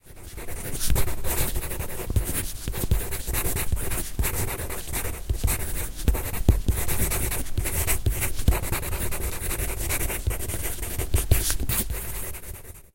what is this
PENCIL ON PAPER - 1
Sound of pencil on paper. Sound recorded with a ZOOM H4N Pro.
Son d’un crayon de papier sur du papier. Son enregistré avec un ZOOM H4N Pro.
desk, draw, drawing, marker, office, paper, pen, pencil, pencil-on-paper, write, writing